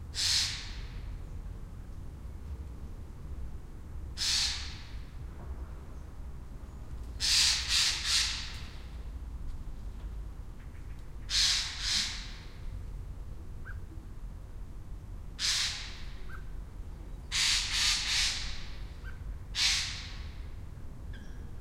A little dispute in our backyard: involved a crow and a magpie, although you can only hear the latter. Olympus LS-10 build-in microphones.